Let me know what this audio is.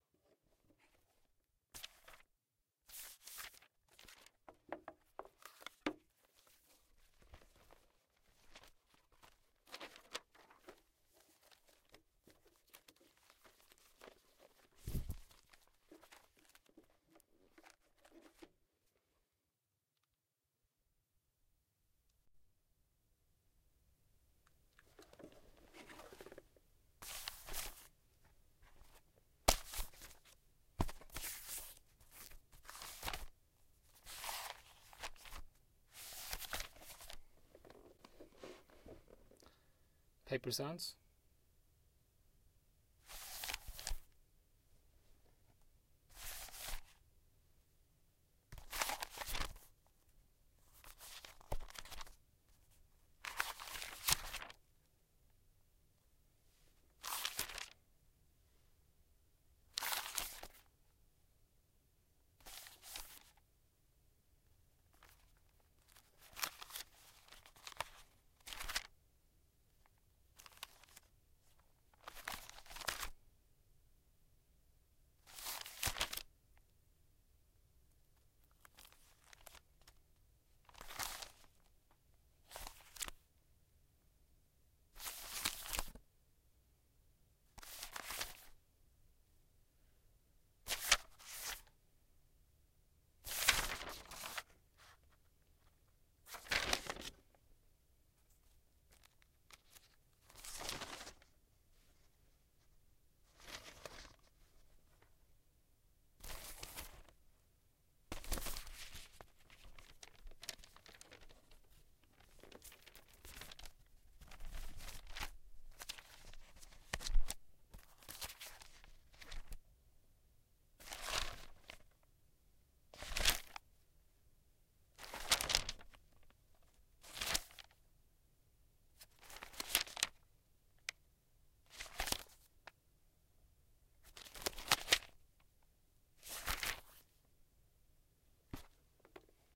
paper shuffle foley document page book flip